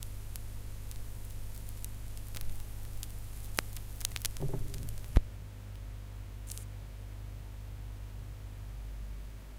LP End #2
The sound of a needle being automatically pulled off a vinyl record.
static, record, LP, noise, vinyl, 33rpm, surface-noise, album, end, turntable, crackle, hiss, player, pop, needle, vintage